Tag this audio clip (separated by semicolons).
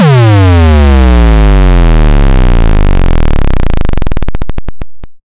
low; beep